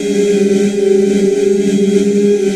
Multisamples made from the spooky living dead grain sound. Pitch indicated in filer name may be wrong... cool edit was giving wacky readings... estimated as best I could, some are snipped perfect for looping some are not.